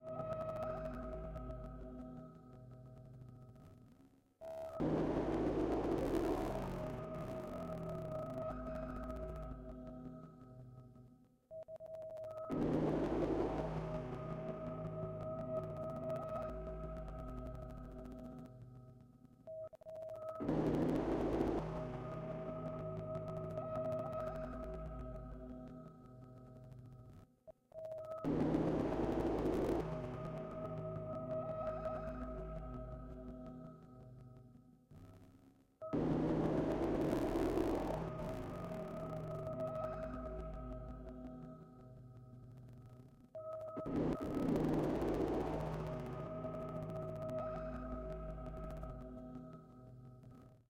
Ambience - Musical Glitch - Cosmic Drift
An atmospheric ambience sound, made with modular equipment.
ambiance, ambience, ambient, atmo, atmos, atmosphere, atmospheric, background, cinema, cinematic, cosmic, drone, effect, effects, eurorack, film, glitch, modular, music, musical, pad, sci-fi, scifi, sfx, soundscape, soundtrack, specialeffects, synth, synthesizer